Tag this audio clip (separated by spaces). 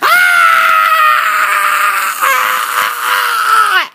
666moviescreams aspyxiate female girl overwhelm sexy vocal voice woman